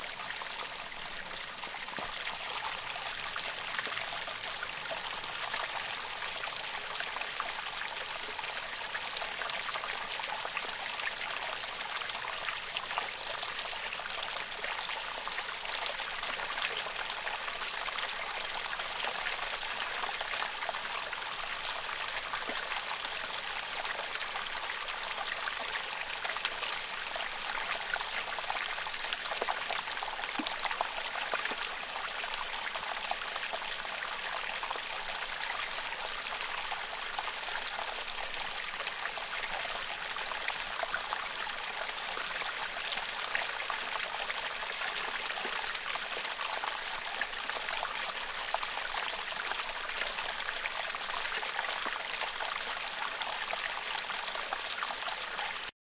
11 water stream; water fountain; calming; soothing; near; peaceful; present
water stream; water fountain; calming; soothing; near; peaceful